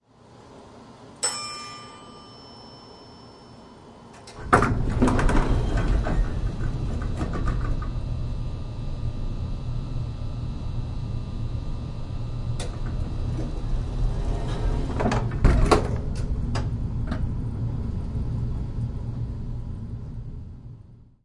Elevator ding door open close, noisy, 10
Elevator door dings, doors open, close (2010). Sony M10.
ding, elevator, opening